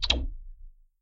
Sounds like a cartoonish TV turning off. Anyway some click noise processed with Vocoder. The click was accidentally recorded with a headset mic.
cartoonish, electric, noise, television, turn-off, tv